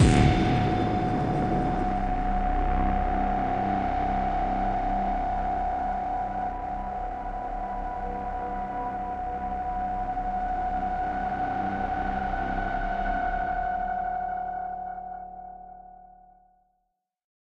this a unused sounddesign file made for Artist Björn Peng.
he wanted a sound rising effect similar heared in a various zombie movie trailer.
i was trying it...this is the one of 5 results.
Used:
- IL Harmor
- Absynth 5
- Free Cwejman S1 Sample
- Free Kickdrum Sample with distorsion
some effects and automation
design, cinematic, shot, impact, score, sound